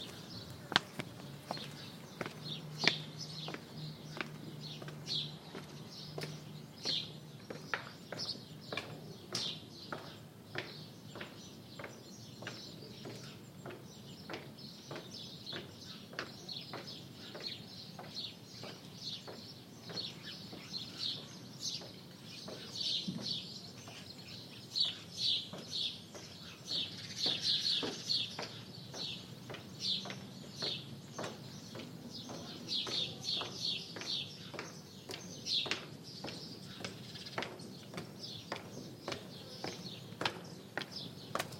steps come and go on stone ground in a village with bird ambient
ambient, bird, village, ground, footsteps, stone